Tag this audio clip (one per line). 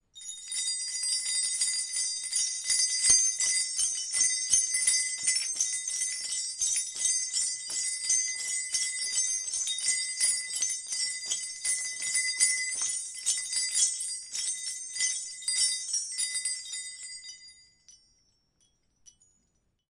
bell
bronze
bunch
chime
clinging
ding
jingle
jingling
loud
ring